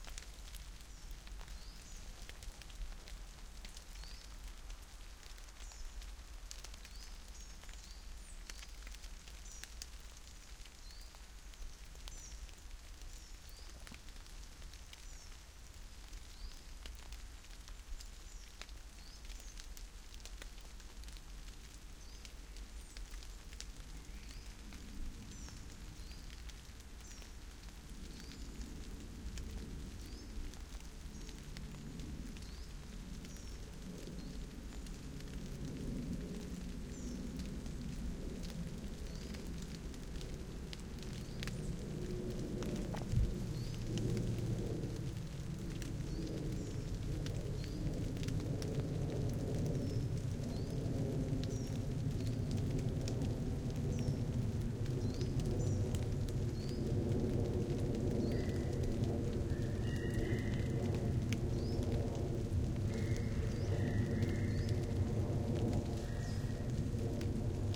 Rain in the Woods
Binaural sounds of the woods in the rain. Includes distant sounds of birds, dogs barking and a plane flying overhead. Recorded on an Olympus LS100 with SR3D Binaural XLR microphone.
ambience, nature, birds, forest, Rain, woods, crows, distant, Binaural, 3d, field-recording, birdsong